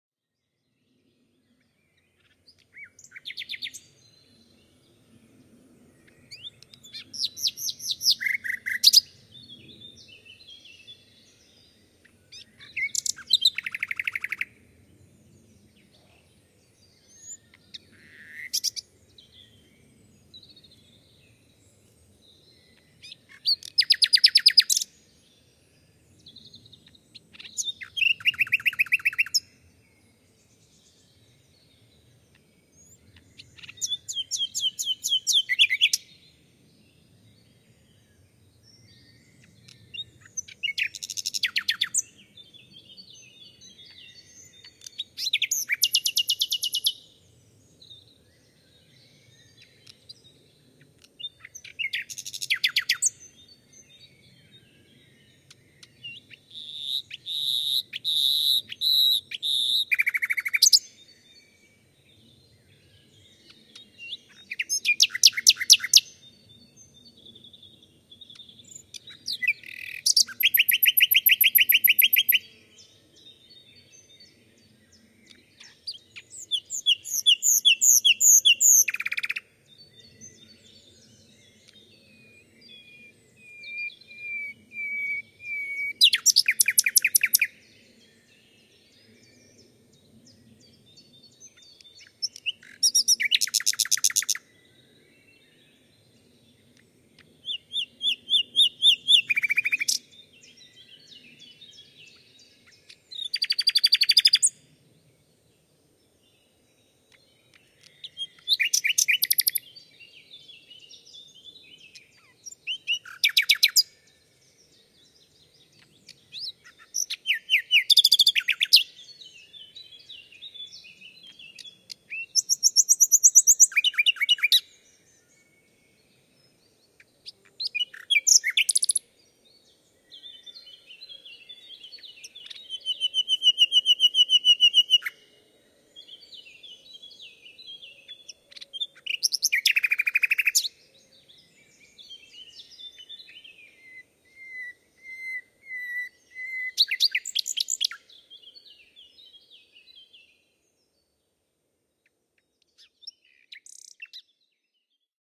Nightingale song 4
Nightingale singing at dusk April, 25. 2010 in a forest near Cologne. Distant traffic and some jetplane noise. Low frequences filtered. Parabolic shield with Vivanco EM 35 plus preamp, Marantz PMD 671.
bird, bird-song, csalogany, etelansatakieli, field-recording, forest, fulemule, luscinia-megarhynchos, nachtegaal, nachtigall, nature, nightingale, rossignol, rossignol-philomele, rossinyol, rouxinol, ruisenor-comun, slowik-rdzawy, sornattergal, spring, sydlig-nattergal, sydnaktergal, usignolo